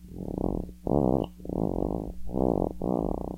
Contact mic edge rubbed against ribbed rubber back texture of a Motorola Moto X.